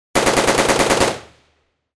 ak 47 - burst
ak 47 burst
It is my recording, used Zoom h2n.